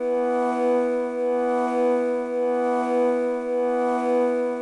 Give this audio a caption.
Drone Synth Loop
Original sound was a piano chord manipulated in the iPad Samplr app. Sounds a bit robotish, droney, could be used as an alarm? Go wild!